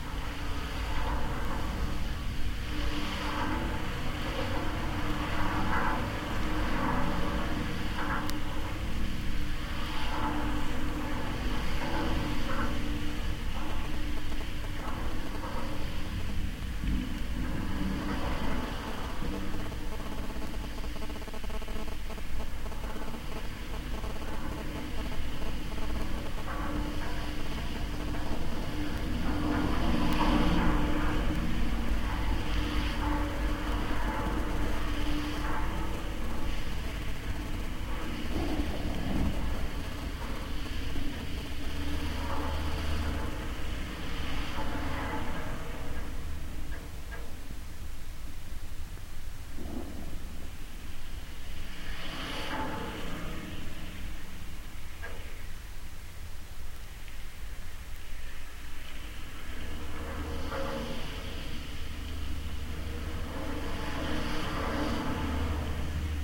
GGB suspender SE52SW
Contact mic recording of the Golden Gate Bridge in San Francisco, CA, USA at southeast suspender cluster #52. Recorded December 18, 2008 using a Sony PCM-D50 recorder with hand-held Fishman V100 piezo pickup and violin bridge.
bridge, cable, contact, contact-microphone, field-recording, Fishman, Golden-Gate-Bridge, piezo, sample, sony-pcm-d50, V100, wikiGong